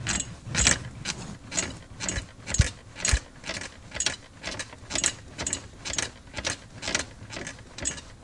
Some action on a noisy bed...